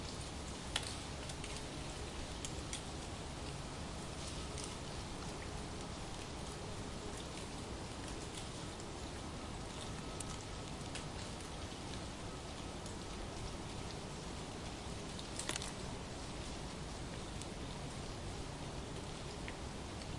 Leaves falling in a forest near a pond. Recorded in October 2017 in a German forest using a Zoom H2n. Loops seemlessly.